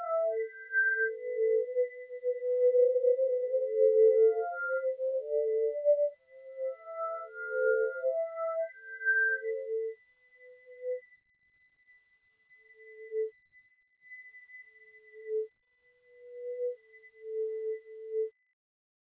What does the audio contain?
Between weird frequencies.